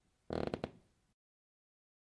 Creaking Floor Low Tone
This sound is of a floor creaking in a low tone.